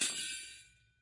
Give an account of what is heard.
University of North Texas Gamelan Bwana Kumala Ceng-Ceng recording 10. Recorded in 2006.